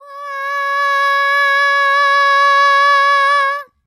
This was a voice record at home for a production. Now it´s for free. Enjoy